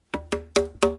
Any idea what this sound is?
Tom-Upstairs
Recorded in field a sound that could be used as tom drum
Please check up my commercial portfolio.
Your visits and listens will cheer me up!
Thank you.
field-recordings, sound, percussion, tom